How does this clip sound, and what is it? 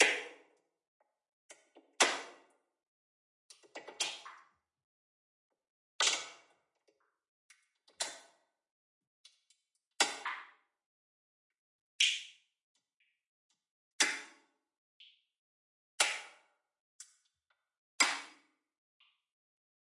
perc hits
Layered percussion hits processed with vocoder, convolution, and reverb. 120 bpm.
Percussion, Snare, Loop